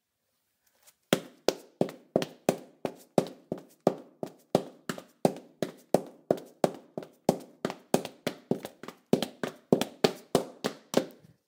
01-17 Footsteps, Tile, Female Heels, Running V1
Female in heels running on tile
fast, female, flats, footsteps, heels, kitchen, linoleum, running, tile